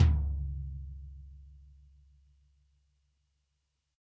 Dirty Tony's Tom 16'' 051
This is the Dirty Tony's Tom 16''. He recorded it at Johnny's studio, the only studio with a hole in the wall! It has been recorded with four mics, and this is the mix of all!
16, dirty, drum, drumset, kit, pack, punk, raw, real, realistic, set, tom, tonys